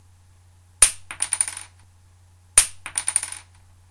pencil falling.
fall, falling, Pencil